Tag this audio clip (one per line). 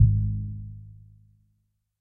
electric-piano
multisample
reaktor